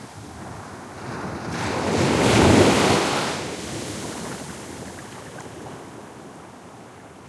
20121014 surf single splash
single wave splashing. Nice stereo image can be better appreciated in headphones. Recorded on Barra del Rompido Beach (Huelva province, S Spain) using Primo EM172 capsules inside widscreens, FEL Microphone Amplifier BMA2, PCM-M10 recorder.